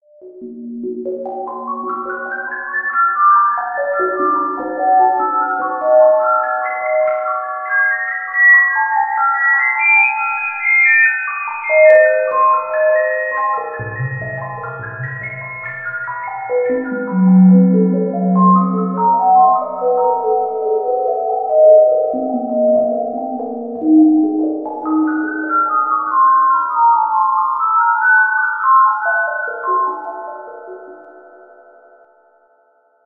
Beeping effect (Remix of 16593)
This is a remix of Sound# 16593. Added secondary flanger affect, with reverb, and echo. Created with Gold-wave